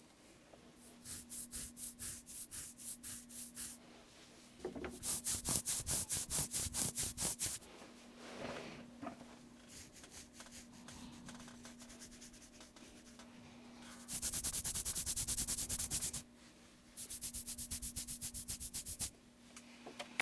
Scratching pants, Hair, and arm